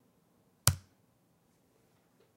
The exe key on a laptop. Have fun.